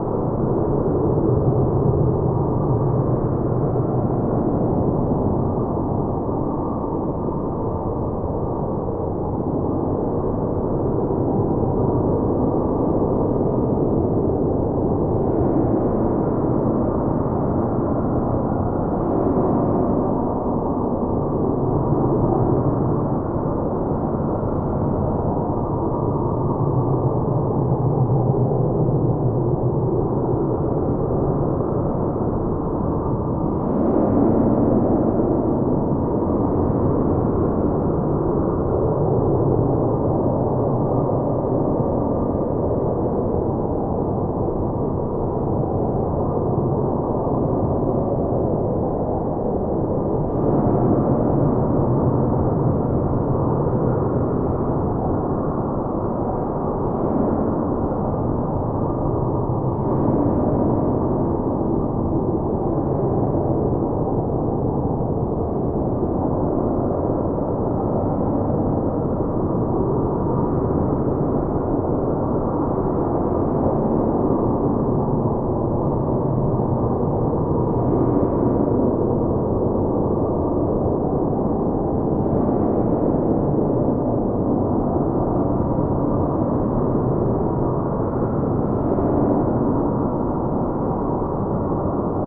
Dark Post Apocalyptic Background 2
A post apocalyptic sfx backgound sound creating a dark atmosphere in your project. Perfect for post apocalyptic, scifi, industrial, factory, space, station, etc.
Looping seamless.
drone, noise, industrial, anxious, wind, effect, futuristic, ambience, apocalyptic, sound-design, post-apocalyptic, background, atmosphere